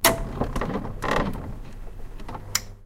One of the mechanical sounds an ice-maker makes.
Recorded with a Zoom H1 Handy Recorder.
click, clicking, creak, creaking, ice-maker, mechanical